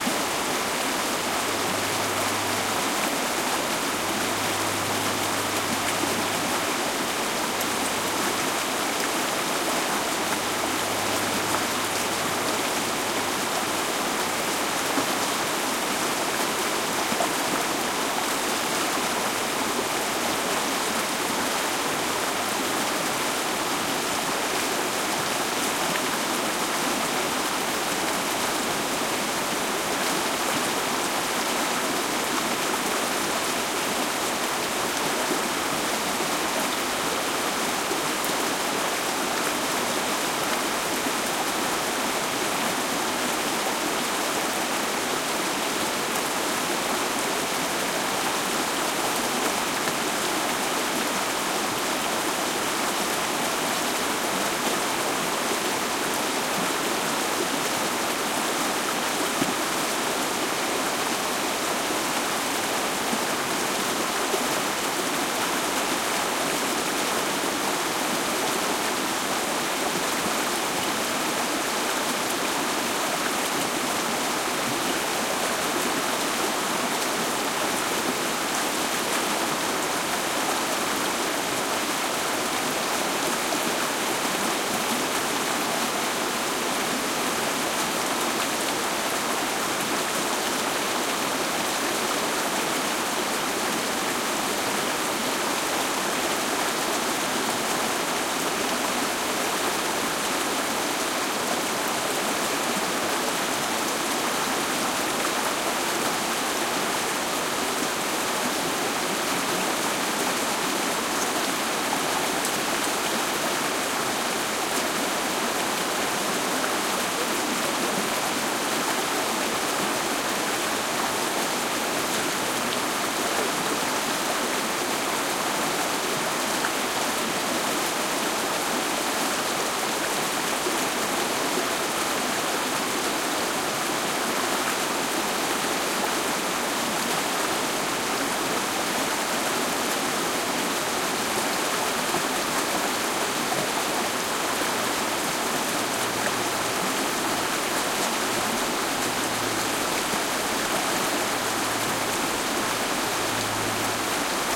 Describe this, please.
The sound of running water. You can hear rapids in the distance and water burbling around rocks up close.
Recorded at Ken Lockwood Gorge, in New Jersey.
2 Primo EM172 mic capsules > Zoom H1